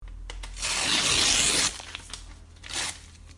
Ripping paper
noise, paper, rip